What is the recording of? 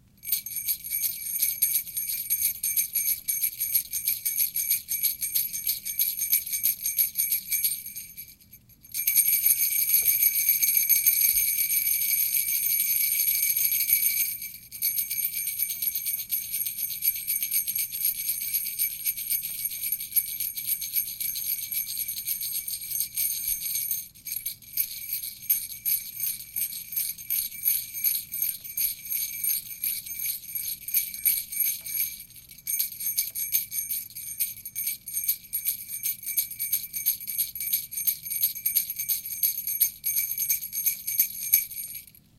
Sleigh Bells Shaking
Me shaking some sleigh bells at various speeds. Recorded at a Foley session at my sound design class with a TASCAM portable recorder.